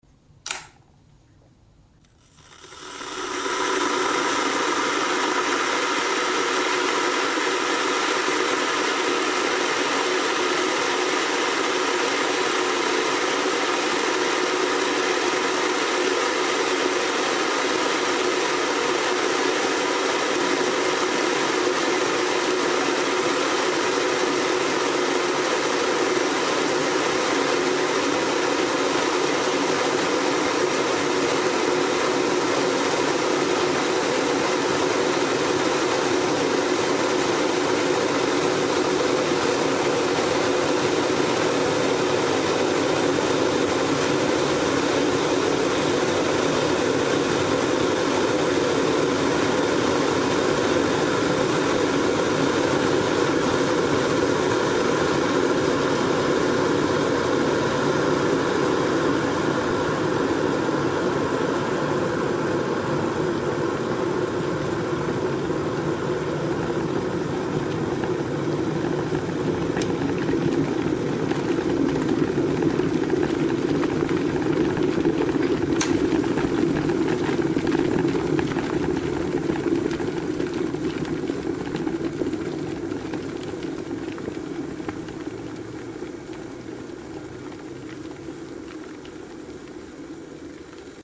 Placed my camera next to the kettle and clicked the switch to boil it. Recorded with a Samsung R10